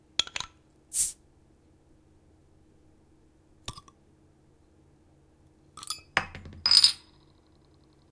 Me opening a bottle.
beer, beverage, bottle, cap, carbonated, drink, fizz, fizzy, open, opening, soda